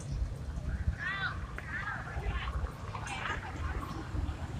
Registro de paisaje sonoro para el proyecto SIAS UAN en la ciudad de Palmira.
registro realizado como Toma No 07-voces 1 chao parque de los bomberos.
Registro realizado por Juan Carlos Floyd Llanos con un Iphone 6 entre las 11:30 am y 12:00m el dia 21 de noviembre de 2.019